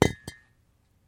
concrete block 1
Concrete blocks knocked together.
Recorded with AKG condenser microphone to M-Audio Delta AP soundcard
effect, concrete-block, stone, hit, strike